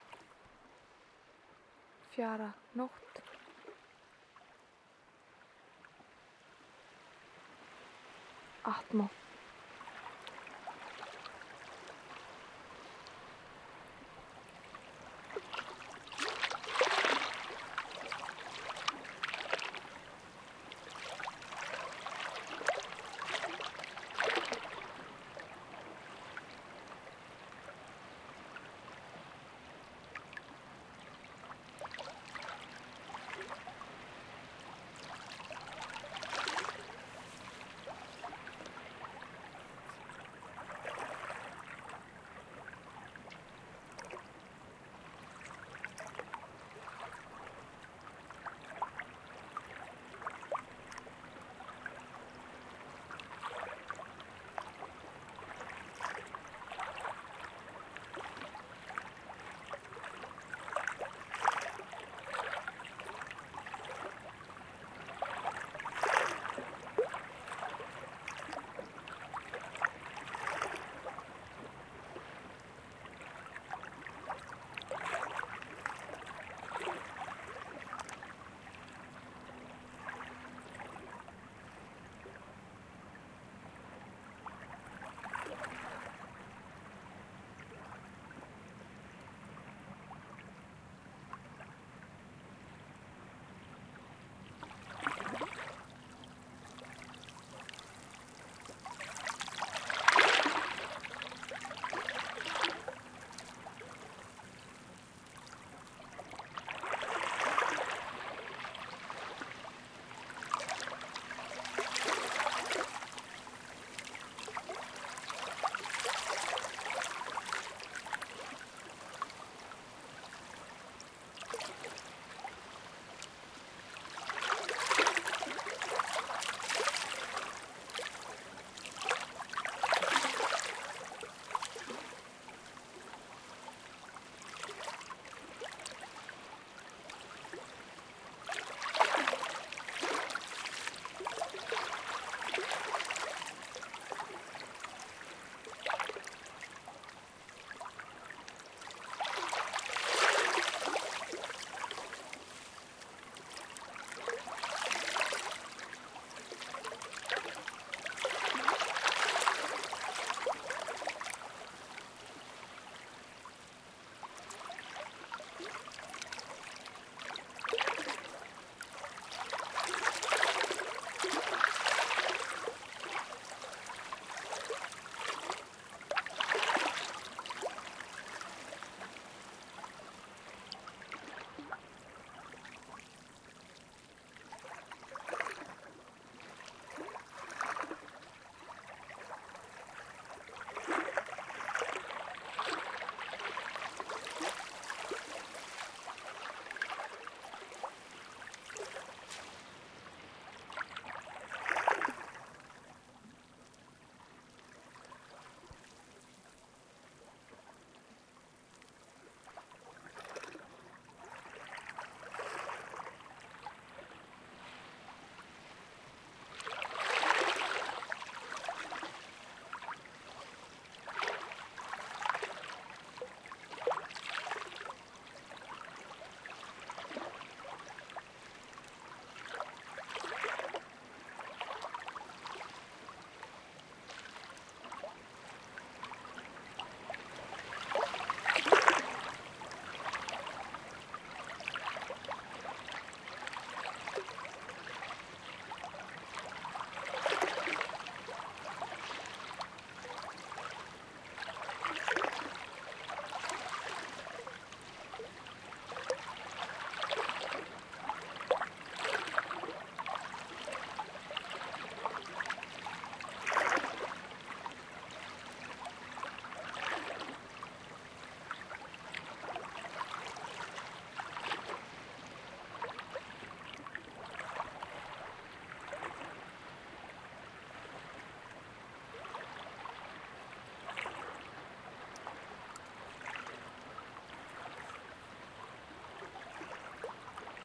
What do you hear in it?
Recorded with a small zoom close to Eskifjörður in austfirðir near the ocean at night. in the distance is an aluminium factory.
nature, night